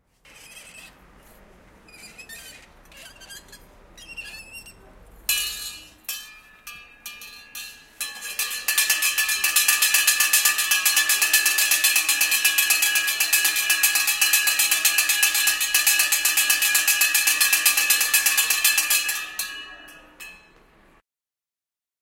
SchoolBell Regenboog Sint-Jans-Molenbeek Belgium
Sound of the school bell form 'De Regenboog' school in Sint-Jans-Molenbeek, Brussels, Belgium.
Belgium, Sint-Jans-Molenbeek, School-Bell